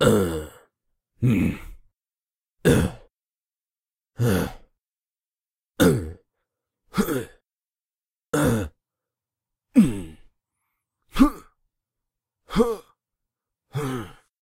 human grunts 3
Me grunting, you could use it for fight scenes etc.
combat, fight, fighter, fighting, groan, grumble, grunt, hand-to-hand, human, male, man, moan, pain, punch, scream, shout, vocal, voice, yell